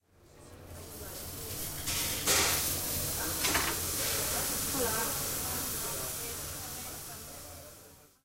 This sound was recorded in the UPF's bar. It was recorded using a Zoom H2 portable recorder, placing the recorder next to the waitress while she was preparing a frankfurt.
UPF-CS13, grilling, bar